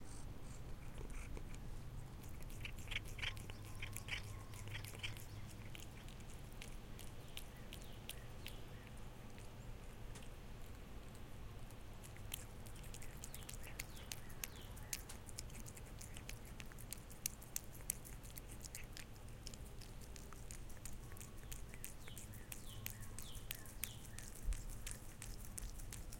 Cat eating peautbutter
Cat. Eating. Peanut butter. That is all. (Hope you enjoy, and yes, this is actually what it says in the title. If you've ever hand-fed a cat any butter-y substance before, you'll probably recognize this sound.)
cat, chew, eat, funny, kitty, lick, munch, peanut-butter, teeth, tongue